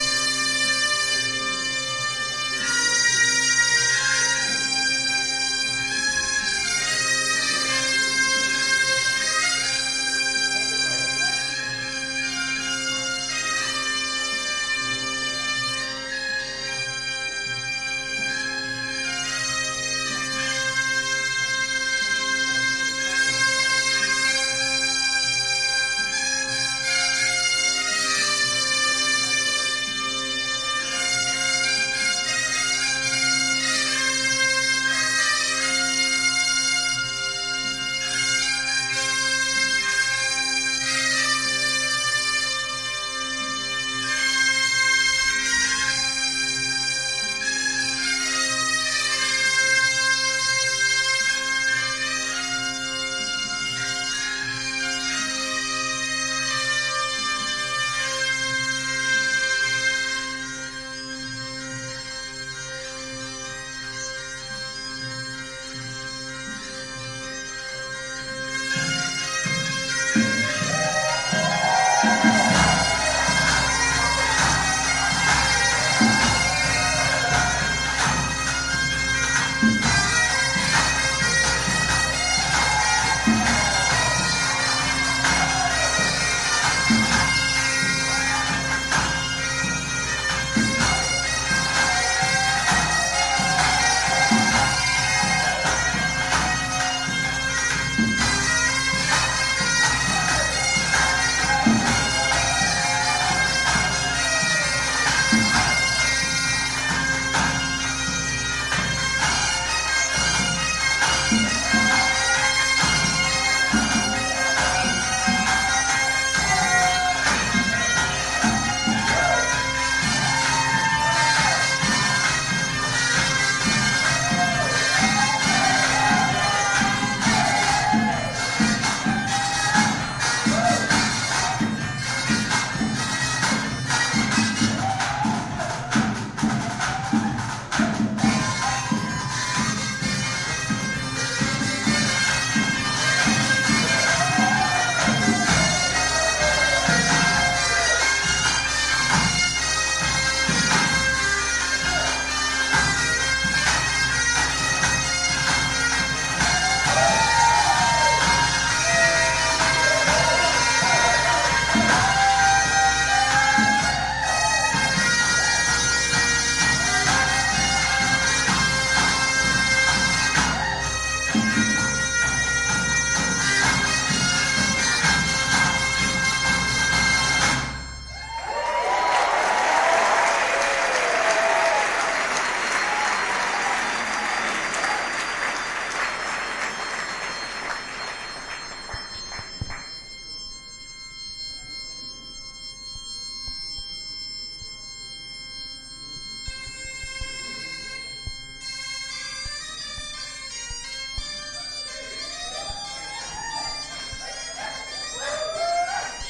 Bagpipe procession, with drums at about 1'09", spirited shouts, revelry, applause of festival crowd at end. NYC.